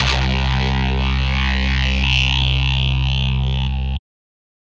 Sample09 (Acid303 D)
A acid one-shot sound sample created by remixing the sounds of
303, acid